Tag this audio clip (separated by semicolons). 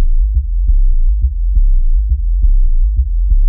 bass; loop; low; sub